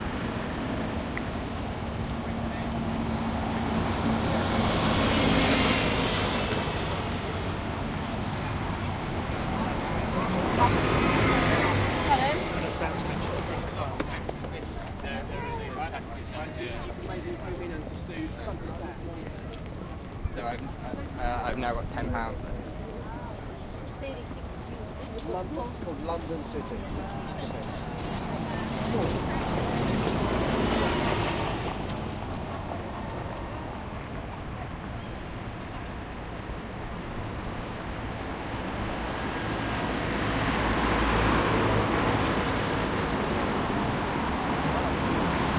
Walking Across London Bridge
Recording of walking along London Bridge, in stereo, with people walking and talking by, cars driving past, and general ambience.
Recorded in MS Stereo on a handheld recorder
ambiance, ambience, ambient, atmos, atmosphere, atom, background, background-sound, Bridge, cars, city, concrete, england, feet, field-recording, general-noise, london, noise, people, road, soundscape, street, traffic, walk, walking